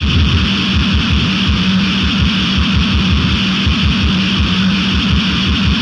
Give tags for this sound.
Alien; Machines

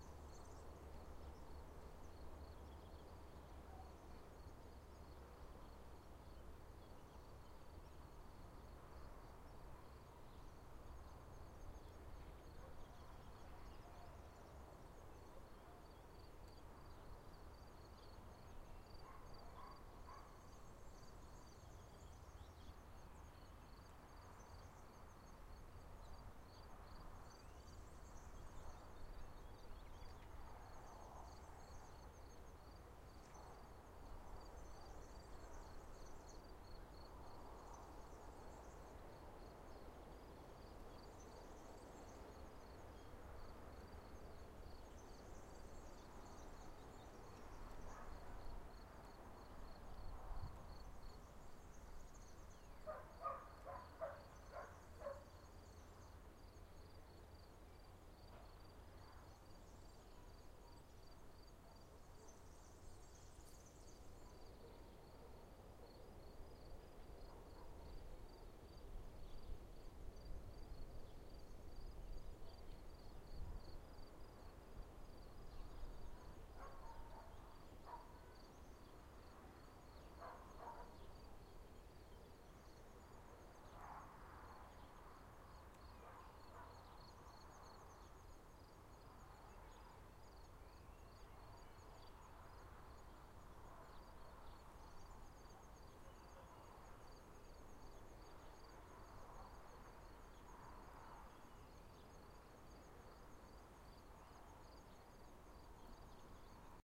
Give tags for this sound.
cars; field-recording; dogs; evening; cricket; ambience

